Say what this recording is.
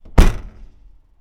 sons cotxe maleter 2011-10-19
car, field-recording, sound